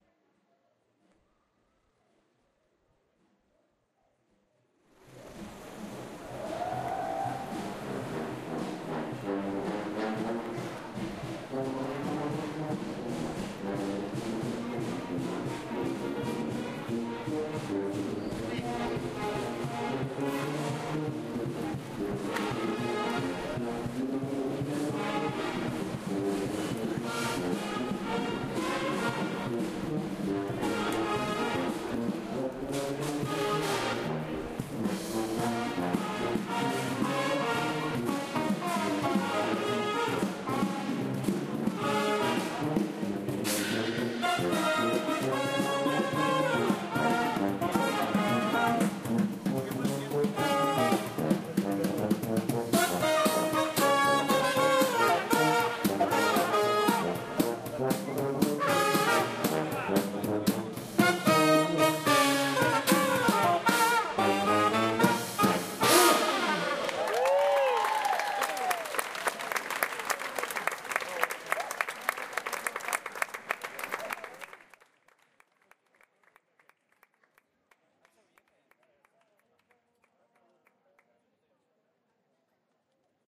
130615-brass band chiado 01
a brass band plays live for free
brass, chiado, portugal, band, lisboa